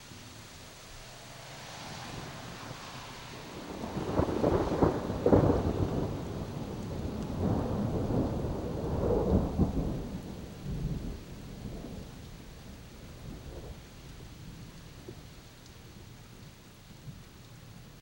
This has a distinguishable crack followed by a rolling that decreases giving the impression that it's rolling away into the distance, which the force of it might have, who knows.